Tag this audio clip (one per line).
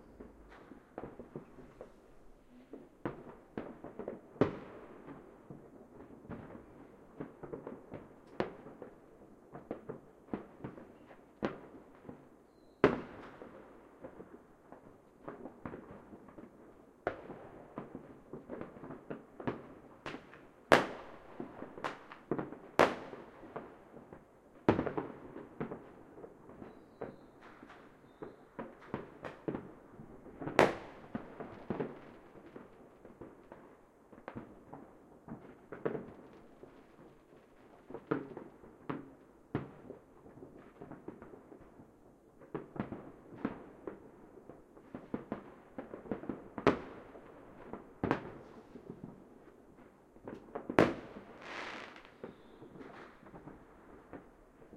explosion
Firework
new
rocket
year